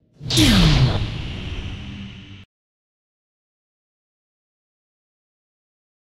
1 Audio Track
Energy-type explosion or blast sound created using microphone, 4 voice layers and multiple effects.
blast, laboratory, laser, space-war, sweep